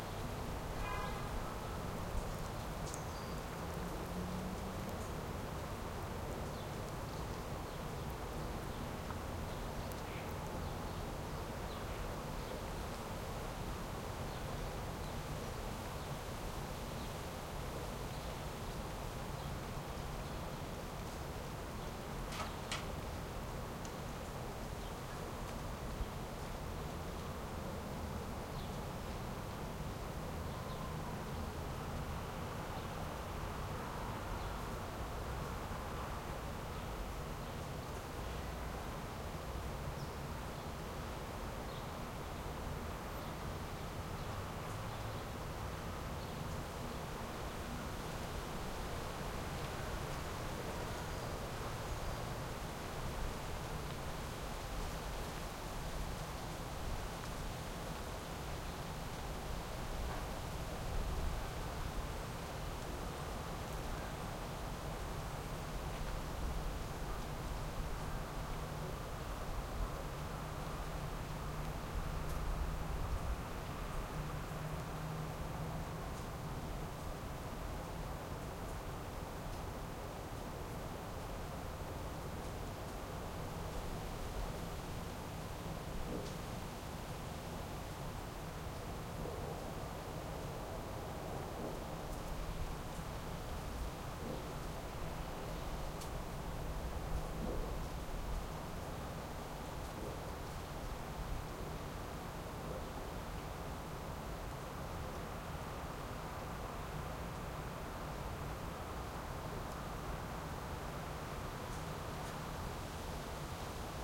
alley quiet winter wet like rain drips birds distant city traffic and activity (most city EQd out) Montreal, Canada
winter, distant, like, Canada, city, alley, rain, drips, birds, quiet, wet, Montreal, traffic